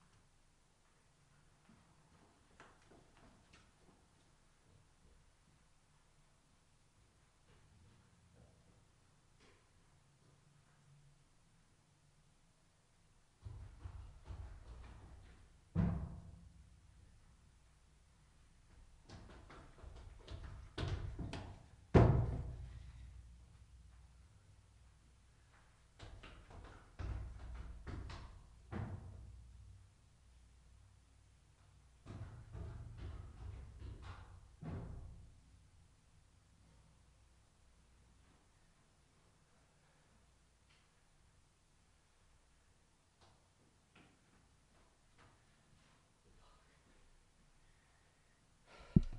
running down stars 2
Running down stair case
stairway, stairs, running, footsteps, walking, staircase